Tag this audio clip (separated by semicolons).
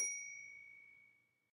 ring; blacksmith; rottary; ting; bell; clang; phone